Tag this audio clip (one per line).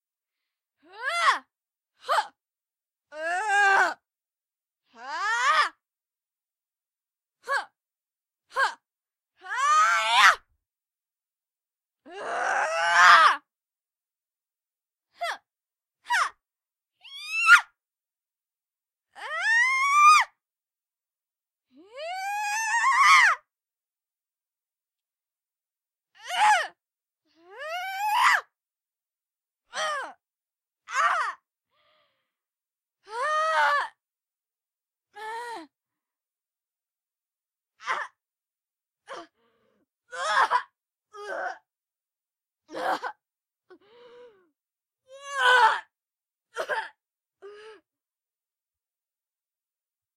acting
actor
anime
attack
battle
combat
conversation
female
fight
fighter
fighting
girl
military
soldier
voice
voice-acting
war